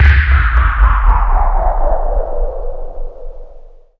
Descending space echo
A descending electronical echo
effect, space, Echo